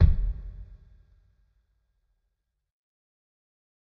Kick Of God Bed 033
pack, kick, trash, drum, kit, god, home, record